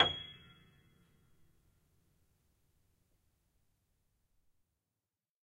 upright choiseul piano multisample recorded using zoom H4n
upright, multisample, choiseul, piano